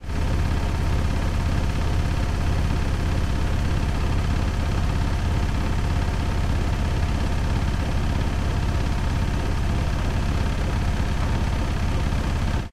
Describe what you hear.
Washing machine and/or drier. Recorded with an ME66.
laundry, washing